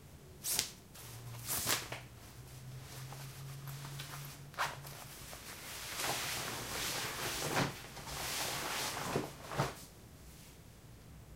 Undressing-polyester-pants
Undressing polyester pants.
polyester, clothing-and-accessories, undressing, pants